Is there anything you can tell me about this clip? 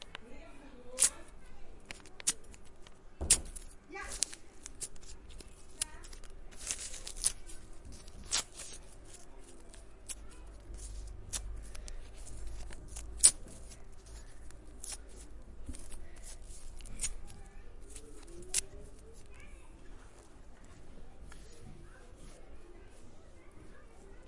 mySound Sint-Laurens Belgium Papier
Sounds from objects that are beloved to the participant pupils at the Sint-Laurens school, Sint-Kruis-Winkel, Belgium. The source of the sounds has to be guessed.
mySound, Papier, Belgium, Sint-Kruis-Winkel